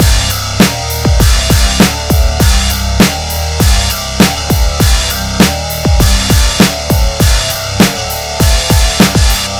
fat beat 1
a little guitar with beats, not very hard to make but i thought id upload something
80s, acid, bass, beats, drums, funk, garage, guitar, metal, rock